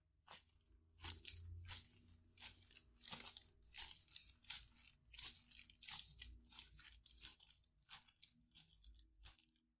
Steps with boots.
Walk, Steps